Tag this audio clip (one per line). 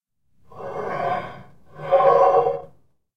boiler-plate
friction
metal
metallic
rub
scrape
scratch
steel-plate
stereo
xy